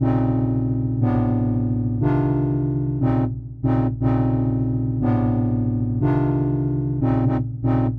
tuby bass horn electronic f e g f 120bpm-04
tuby bass horn electronic f e g f 120bpm
bass, electronic, tuby, loop, horn, 120bpm